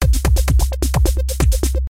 Intense Bass Rave Sound by Cris Roopa
acid, action, bass, beat, club, dance, drum, dubstep, effect, electro, electronic, field, fx, hammered, house, intense, loop, music, noise, rave, record, rhythm, signaled, sound, space, stereo, synth, techno, trance